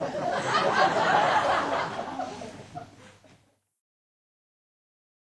LaughLaugh in medium theatreRecorded with MD and Sony mic, above the people
audience, auditorium, crowd, czech, laugh, prague, theatre